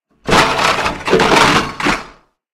SmashingResonanceRe-rated MechaniCycle
This unusual sound is extracted from "SmashingResonance" and re-sampled to a fraction of the original rate in order to spread it out over time and to lower its pitch (I believe it was 1/6). Some synthetic harmonics were created with distortion, the filtered and blended back in with the sound so that it doesn't sound so terribly low-pass filtered (which of course it was). It has an effect kind of like some sort of machine performing a repetitive motion, but only for one cycle. I don't know what machine, just use your imagination. See the pack description for general background.
bug, crack, crackle, crunch, crush, eggshell, egg-shells, grit, machine, quash, smash, smush, squash, squish